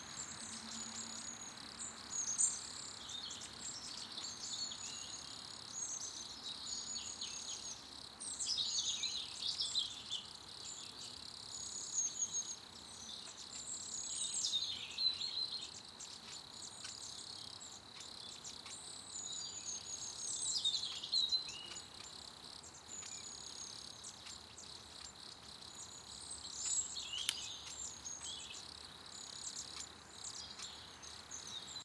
Microphone: Rode NT4 (Stereo)